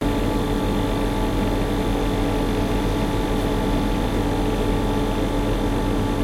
sound of the outdoor unit of an air condition during the summer